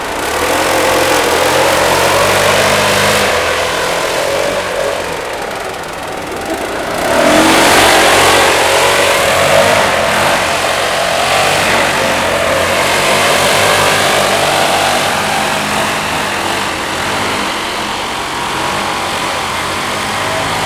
leaf blower outdoors